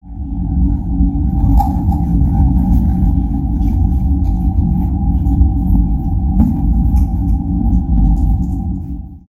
Chicken and cheese quesadilla part 2
Chicken and cheese quesadilla
cheese, Chicken, biting